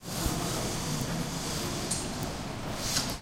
curtain up
Sound of a curtain going up in library.
Recorded at the comunication campus of the UPF, Barcelona, Spain; in library's first floor, next to consulting computers.